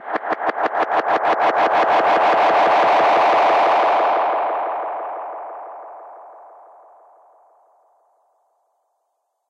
Adaption of my "backwards swoosh" sound effect, fed through a delay VST with the feedback quickly increased to a very high level and then dropped down. Reminded me of a suitable effect for a warphole or something like that, hence the name. Yet another attempt at making an "Astroboy" style effect.
backwards, swoosh, echo, feedback, delay, space, science-fiction, sci-fi